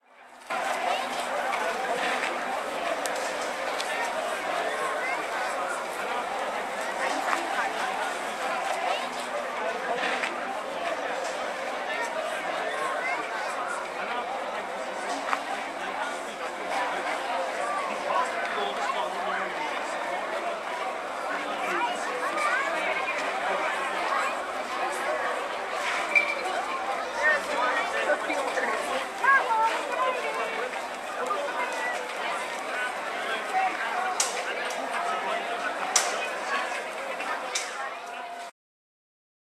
A crowd talking